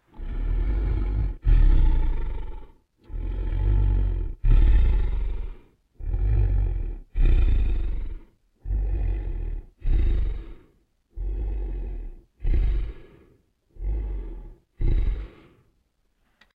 Lightweight growl of a dragon or monster etc